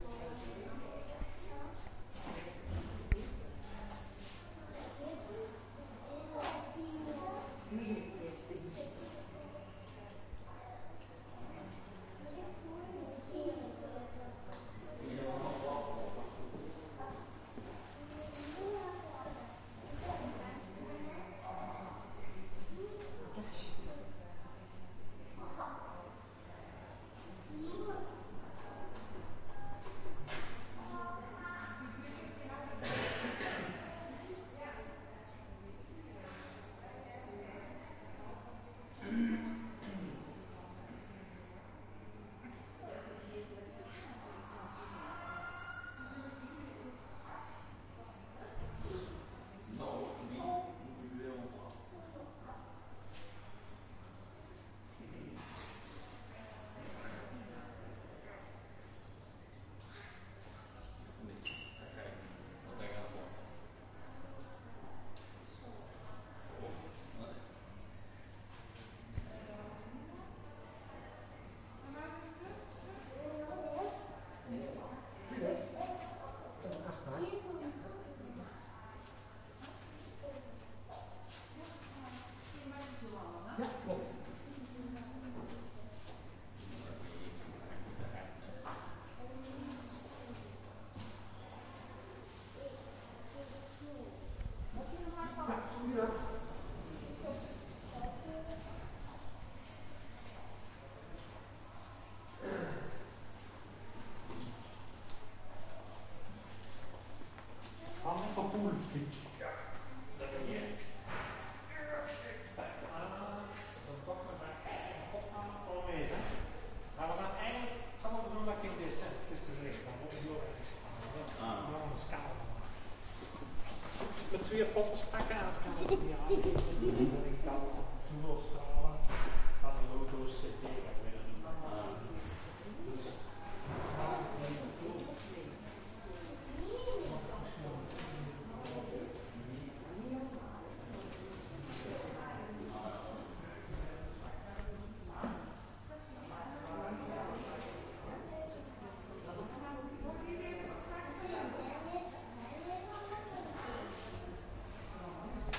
hospital hallway waiting room
Field recording in the waiting room in a hospital hallway.
waiting, ambiance, ambience, field-recording, people, chatter, waiting-room, hospital, hallway, voices, room